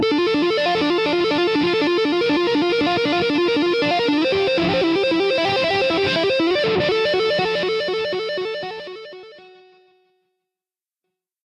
a finger tapping lick recorded with audacity, a jackson dinky tuned in drop C, and a Line 6 Pod UX1.
death-metal, metal, finger-tapping, guitar-tapping, guitar-riff, breakdown, guitar, metal-riff, death-metal-riff, break-down, finger-tap, riff, deathmetal